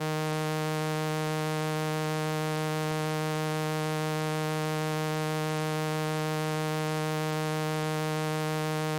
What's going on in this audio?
Transistor Organ Violin - D#3
Sample of an old combo organ set to its "Violin" setting.
Recorded with a DI-Box and a RME Babyface using Cubase.
Have fun!
70s, analog, analogue, combo-organ, electric-organ, electronic-organ, raw, sample, string-emulation, strings, transistor-organ, vibrato, vintage